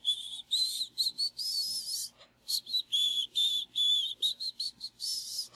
tooth whistle
whistling through my teeth
tooth, whistling